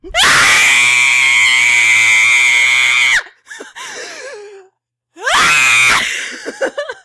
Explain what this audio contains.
so frustrated
WARNING: EXTREMELY LOUD!!
this scream is of pure frustration of losing another night at FNaF4